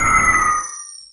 Sad Magic Spell
down, less, reduce, sad